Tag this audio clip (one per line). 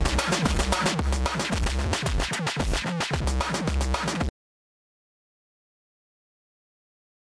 latha
percussive
lo-fi